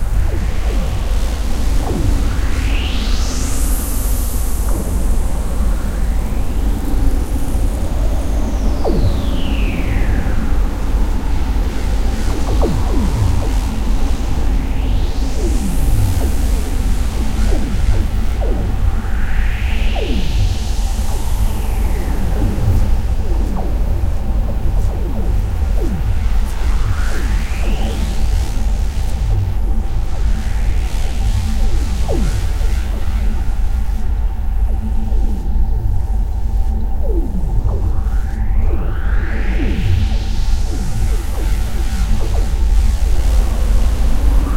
Portal Idle
Black-Hole, Portal, Time-Warp